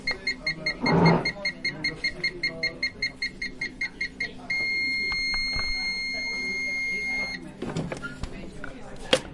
Hotel Waffel cooker Beep
Stereo
I captured it during my time at a Hotel.
Zoom H4N built in microphone
road-trip, voices, hotel, fancy, noise, vacation